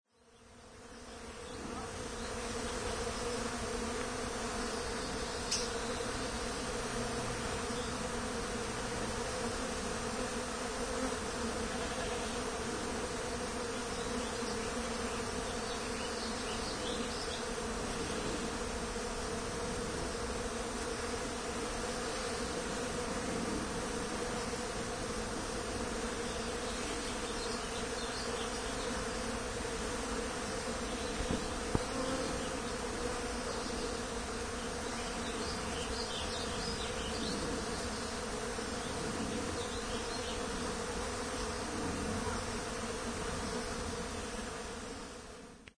BEES AND THE BIRDS
I was out back and from a distance I heard the bees humming in the trees. Walking over, it got louder and louder and I couldn't believe how many honey bees there were and they were all on the leaves picking up pollen or whatever. Checking again, it looked like there was a secretion on the leaves that they were going after. Nice to see that many honey bees after hearing that they are on the decline. Of course the birds also got in on the act. Recorded with my Yamaha Pocketrak and edited in Sony Vegas with 2 track duplications.
Buzzing, Birds, Chirping